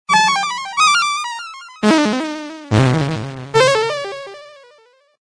made a alien voise with the soft ware challed wave pad
alien, animated, voise